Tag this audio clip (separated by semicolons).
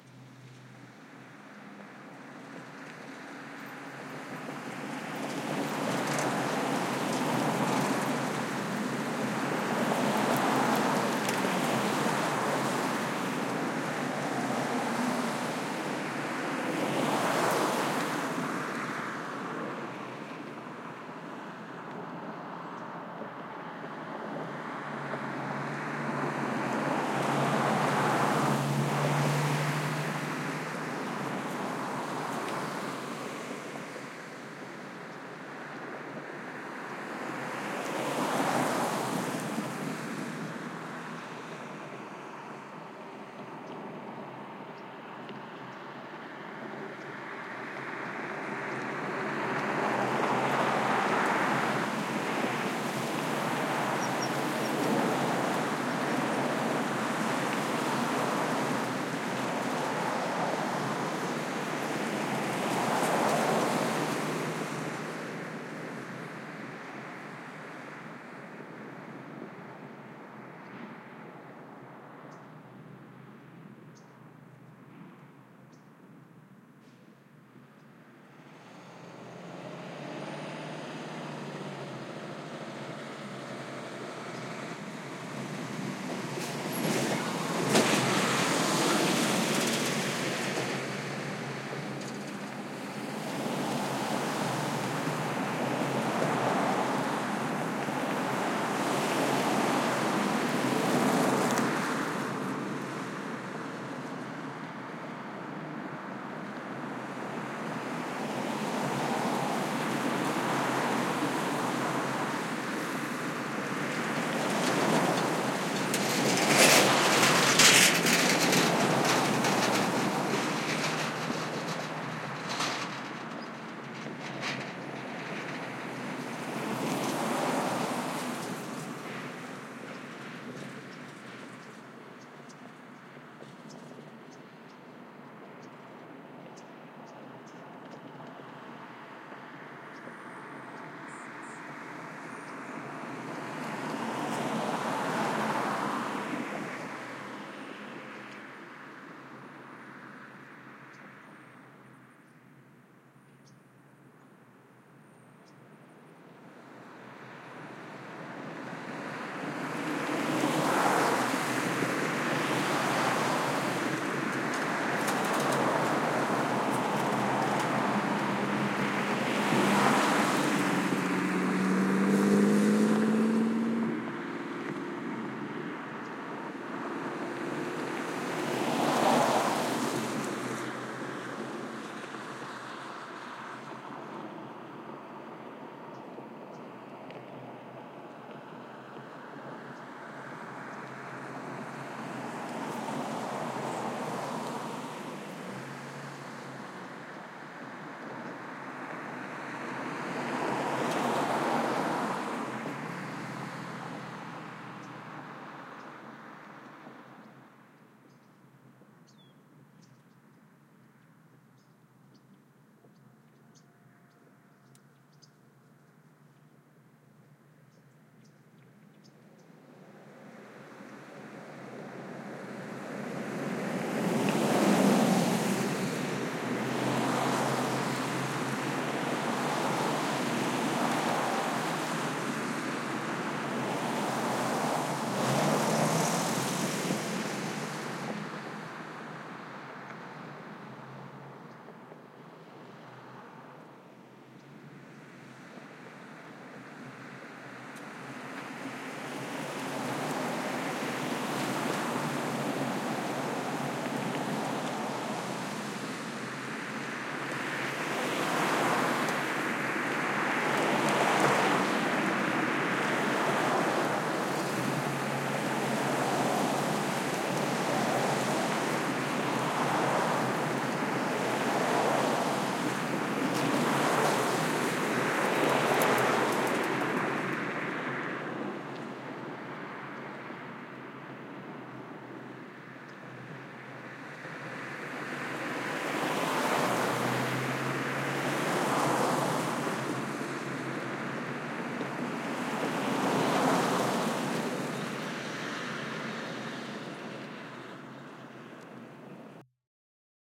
street; passing; traffic; by; ambiance; city; road; cars